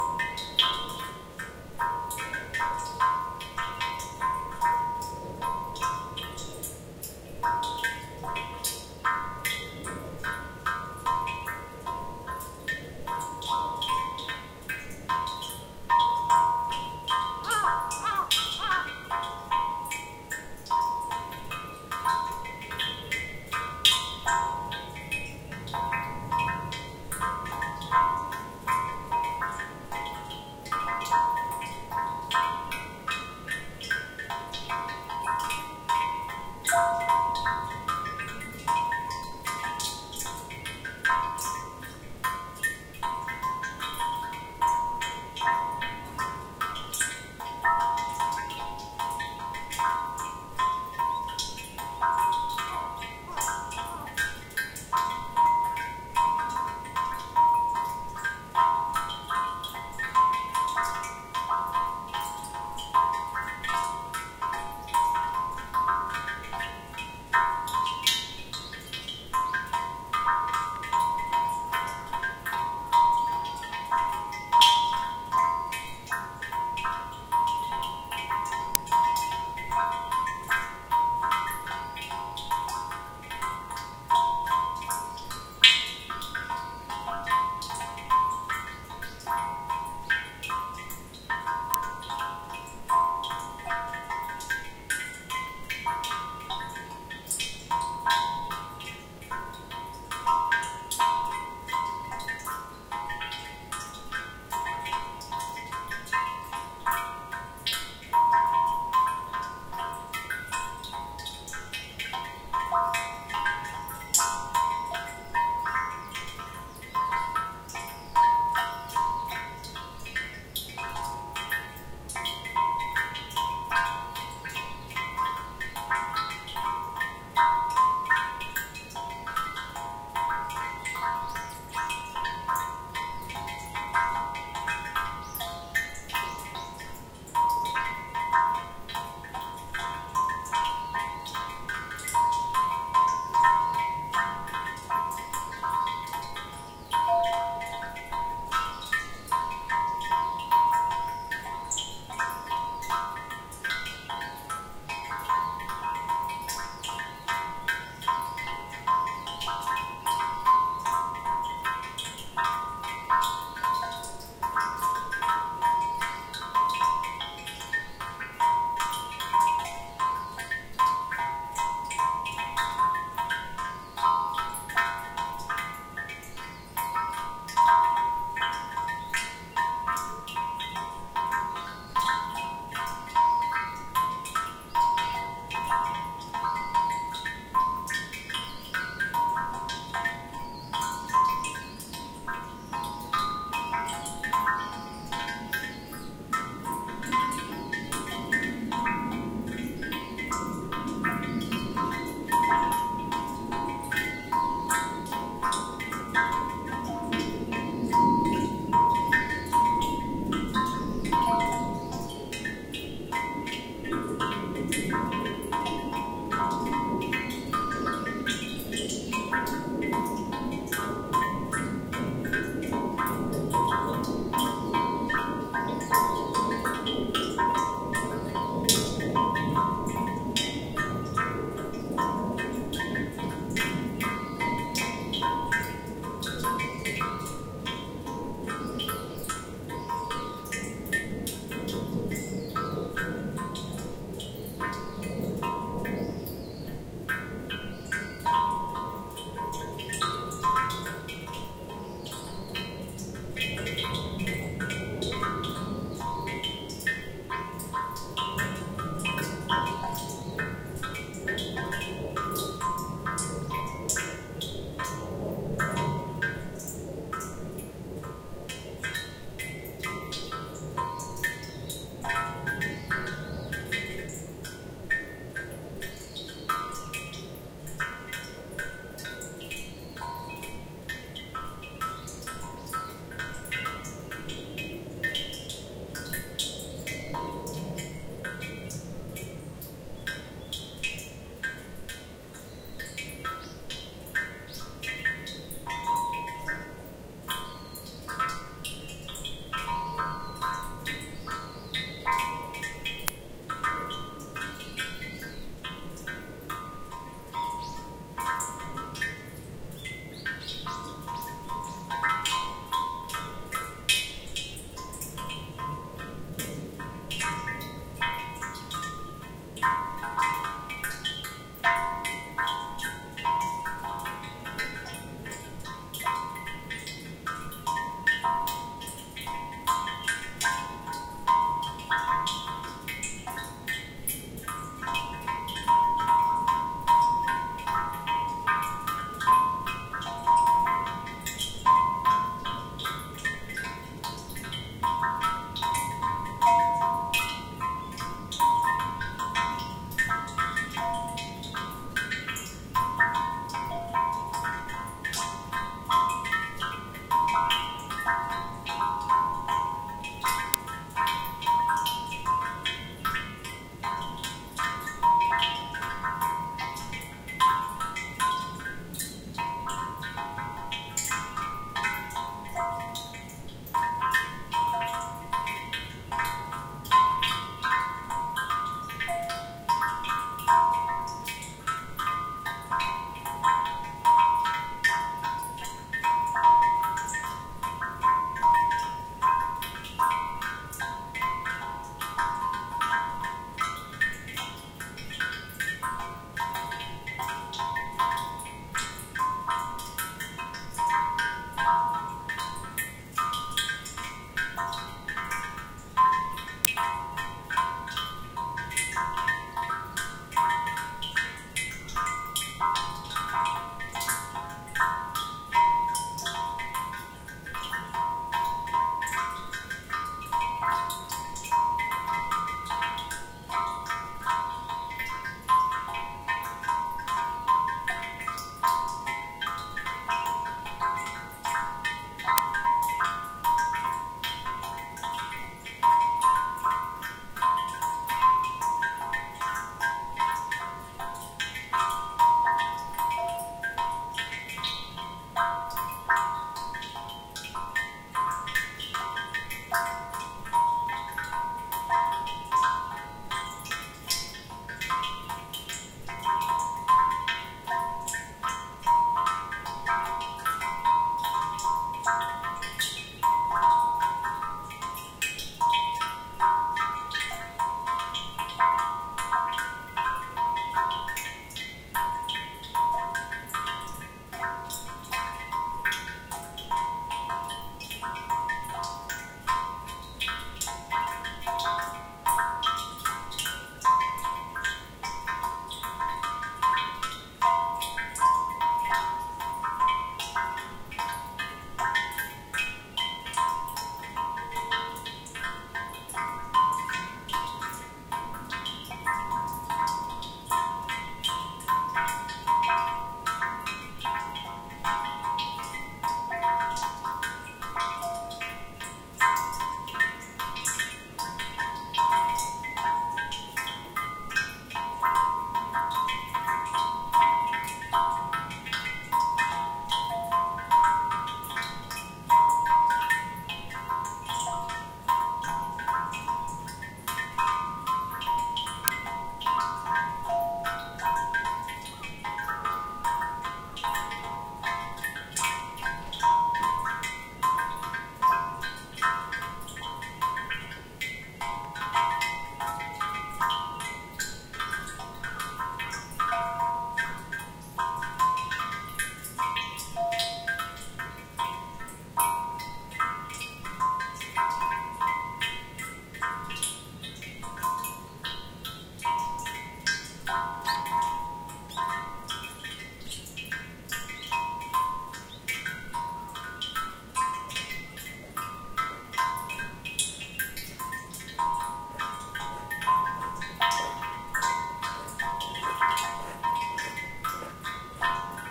Suikinkutsu at Enko-ji
Suikinkutsu (Japanese sound/water-based garden ornament) in the Zen garden at Enko-ji in Kyoto, Japan. Recorded January 2014.